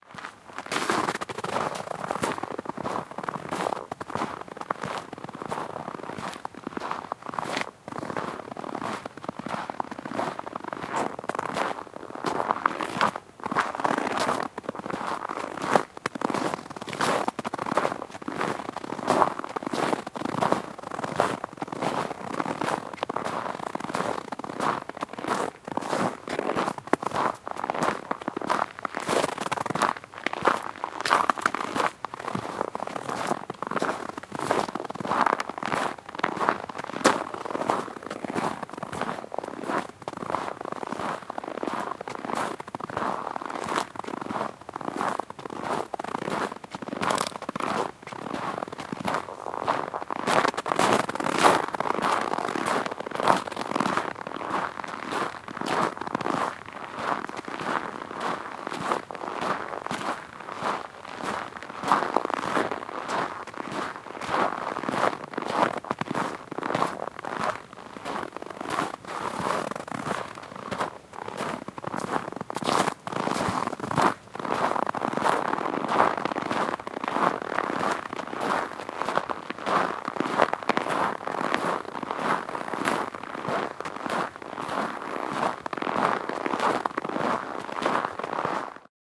Steps In Snow 2 - Schritte Im Schnee 2
Steps on icy snow, very crunchy.
Sounds also very interesting using a flanger :)